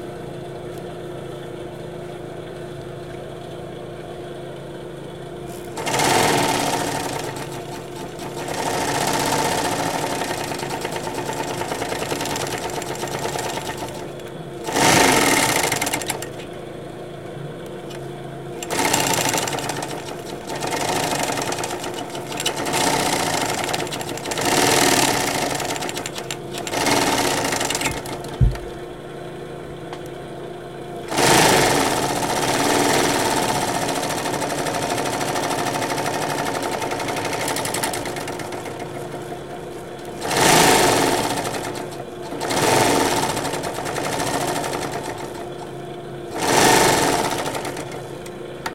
maquina de costura 2
máquina de costura; sewing machine.